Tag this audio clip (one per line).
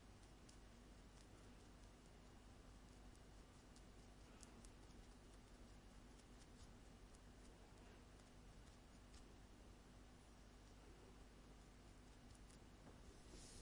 mascara
eyelash
Rubbing